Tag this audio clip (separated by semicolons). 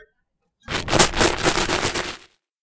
Hit; Monedas